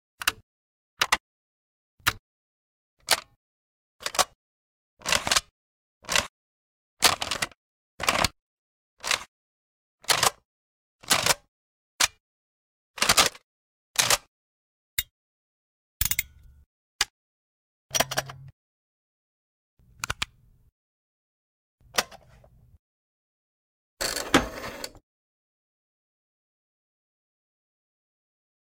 Various buttons, switches and levers. I recorded just about everything I could find in my apartment.